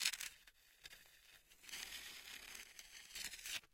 Queneau frot metal 10
prise de son de regle qui frotte
clang, cycle, frottement, metal, metallic, piezo, rattle, steel